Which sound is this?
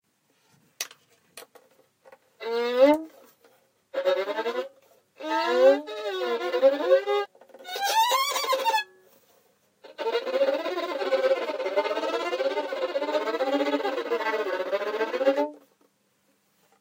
violin frightened2
noise, non-vibrato, se, strange, string-instrument, violin